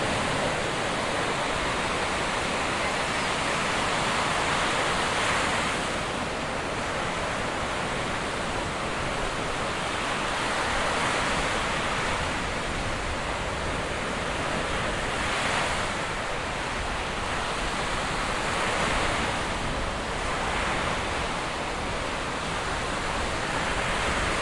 Recorded whilst sitting on Porthcurno Beach, Cornwall, England on a sunny but windy August afternoon. The tide was in and the waves were moderate, although they sound bigger on the recording. You can hear waves on the sand, rolling surf, kids playing and screaming and some seagulls. One of a series recorded at different positions on the beach, some very close to the water.